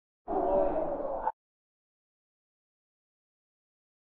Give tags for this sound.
spectre; nightmare; ghost; creepy; sinister; terror; drama; fearful; terrifying; shady; haunted; suspense; thrill; scary; bogey; horror; dramatic; halloween; spooky; frightful; fear; phantom

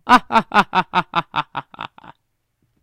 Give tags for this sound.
evil; villain

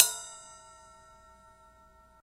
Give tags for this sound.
ride; bell

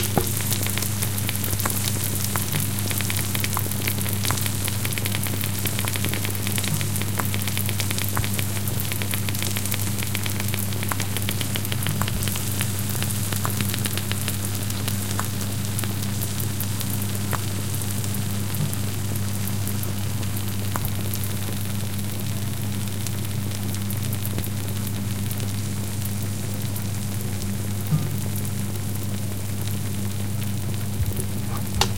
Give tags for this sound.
Clicks; Cooking; Lasagna; Oven

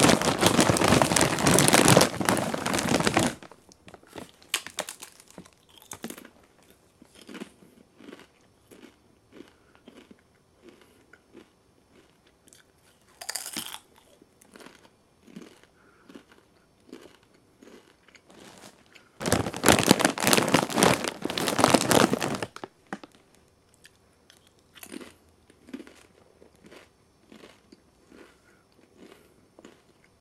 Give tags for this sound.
Bag; Chewing; Chips; Crunch

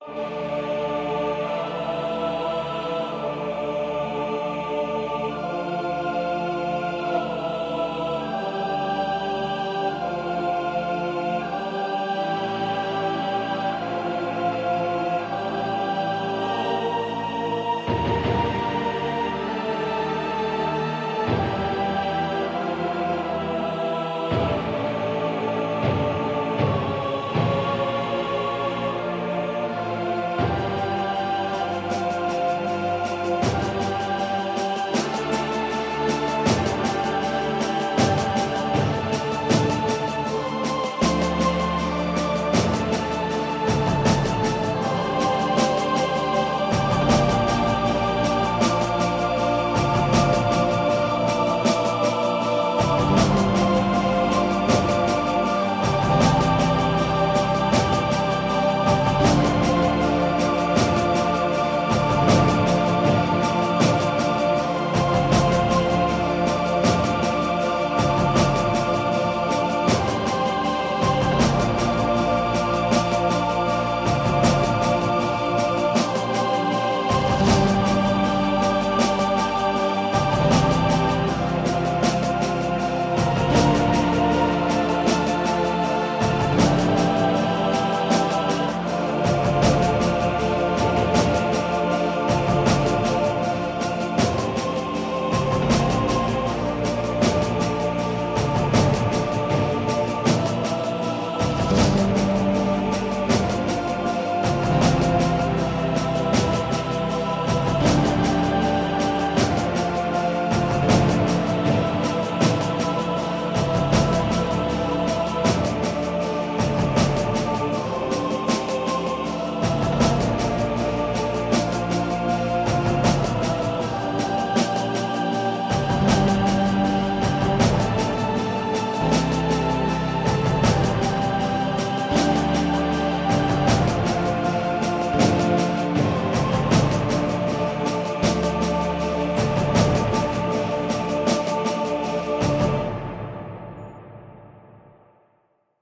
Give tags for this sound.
singing; voice; chorus; Epic; song; music; polyphonic; choral; timbal; cinematic; CompMusic; percussion; choir; piano; orchestra; originalmusic

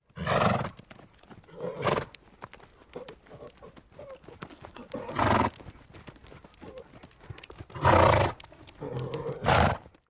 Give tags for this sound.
snort; hooves

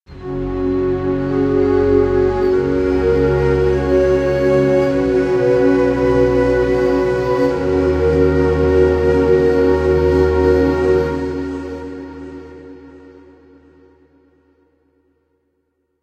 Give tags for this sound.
ambience; ambient; atmosphere; background; chord; cinematic; dark; drama; dramatic; film; instrument; instrumental; interlude; jingle; loop; mood; movie; music; outro; pad; radio; scary; soundscape; spooky; suspense; thrill; trailer

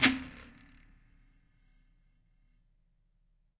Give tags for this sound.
acoustic; metalic; percussive; rub; scrape; spring; wood

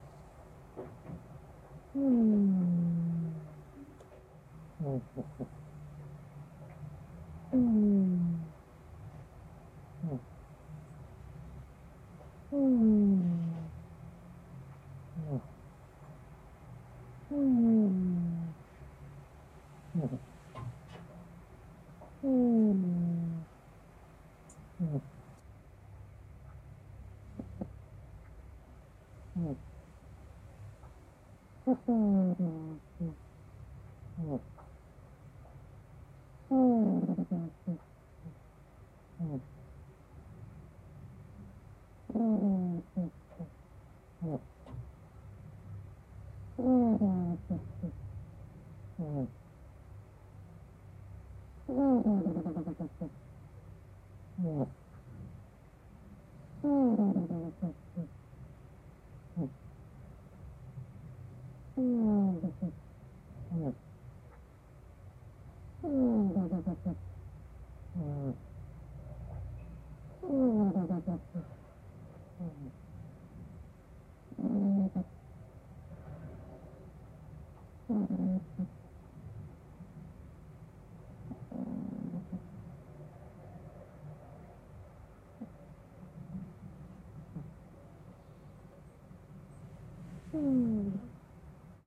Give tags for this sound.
snoring; cat; cute; sleeping; animal

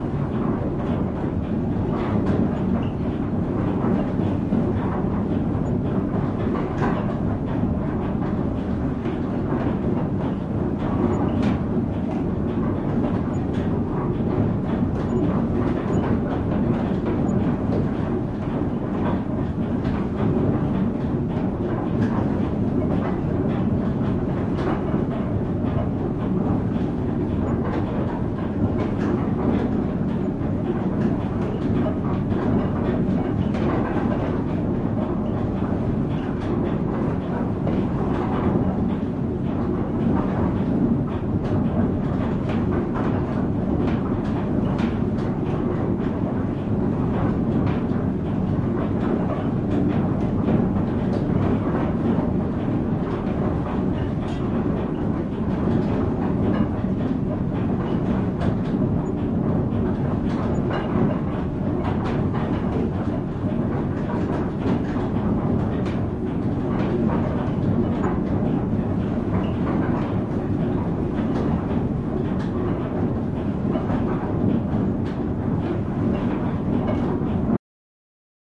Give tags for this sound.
machinery; mechanical

